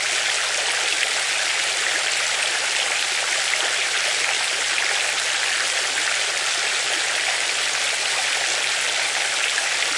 A recording of a water fountain near levi plaza San Francisco.Loopable.